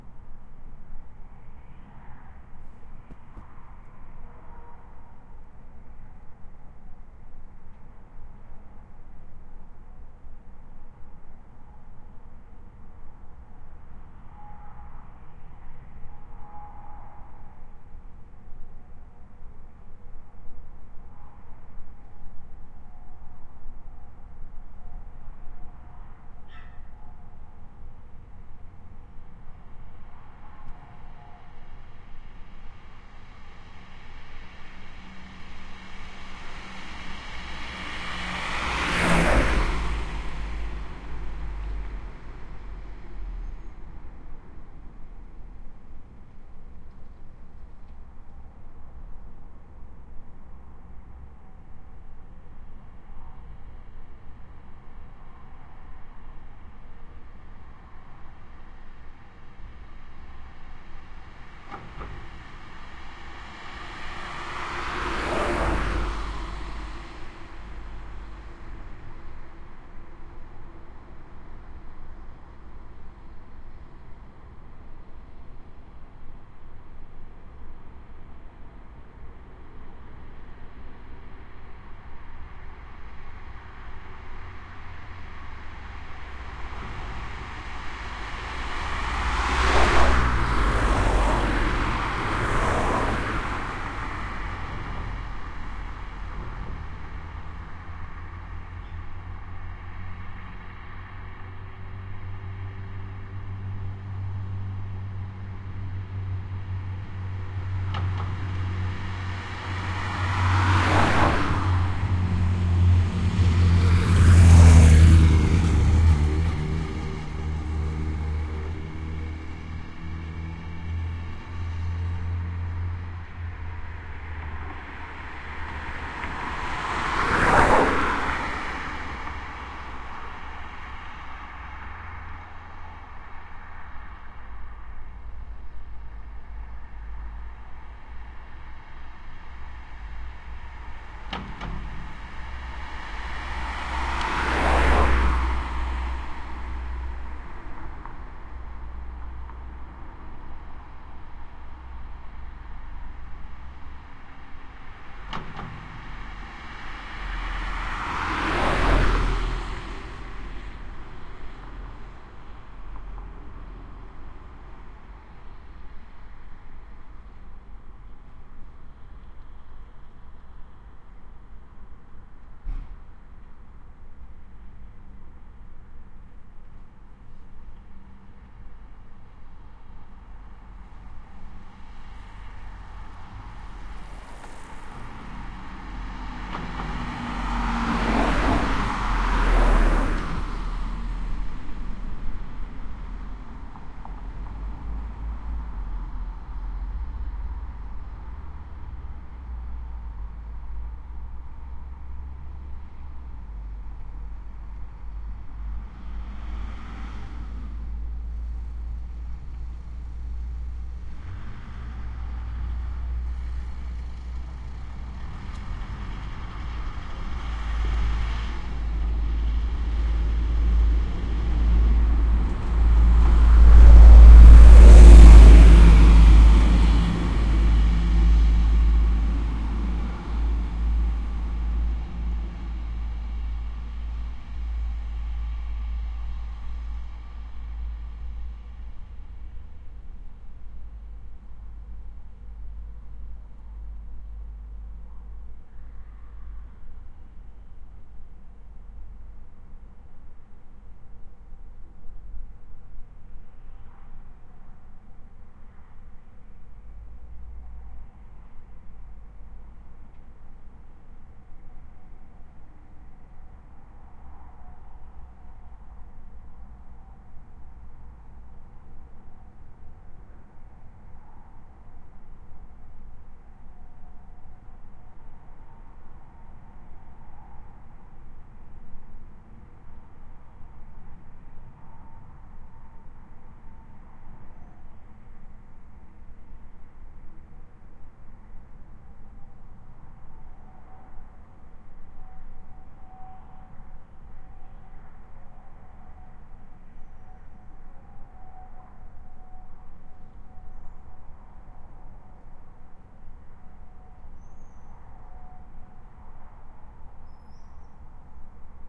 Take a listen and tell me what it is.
the road
Somehow this recording reminds me of the start of the binaural radioplay "The Mist". Traffic in a village at night. EM172 microphones into PCM-M10 recorder.
cars, field-recording, nighttime, road, traffic